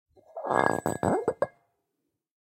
20170101 Big Wine Bottle on Ceramic Floor 11
Big wine bottle on ceramic floor, recorded with Rode iXY.